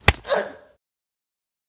Sharp Impact Girl OS
Impact Female Voice
Female, Impact, Voice